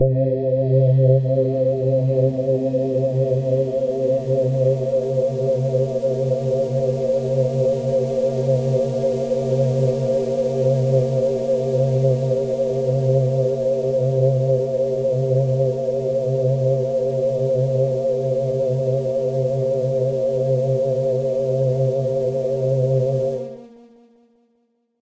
airpad80bpm
8 bars pad that sounds like air.
drone, space, pad, evolving, air, ambient, 80bpm, breath